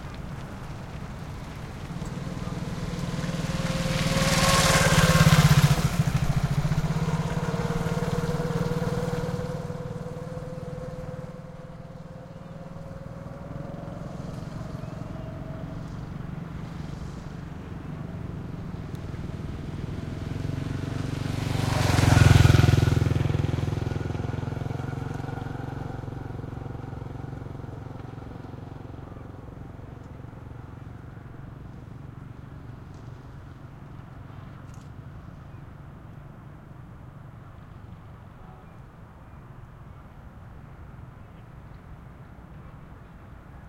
scooters pass by slow and medium speed